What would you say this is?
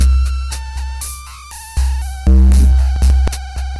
FLoWerS Viral Denial Loop 002
A few very awkward loops made with a VST called Thingumajig. Not sure if it's on kvr or not, I got it from a different site, I forgot what though, if you find it please link to it!
loop, noise, awkward, arrythmic, weird, strange